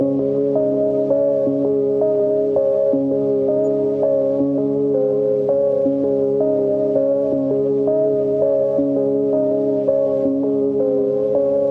Vintage Synth 1 [82bpm] [A Sharp Min]
82, aesthetic, analog, bpm, synth, vaporwave, vintage, vinyl, wiggly